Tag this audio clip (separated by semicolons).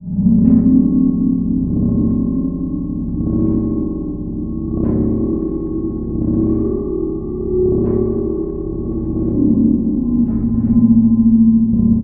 modular; spring-reverb; 60s; radiophonic; SFX; alien; otherworldly; sci-fi; horror; retro; synthesizer; science-fiction; electronic; analogue; atomosphere; amplitude-modulation; noise; synthetic; space; 70s